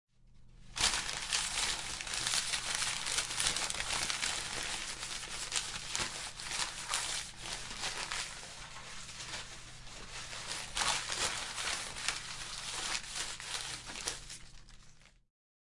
40-papeles moviéndose aire con chico
A sheets of paper sounds
sounds, paper, papers, sheets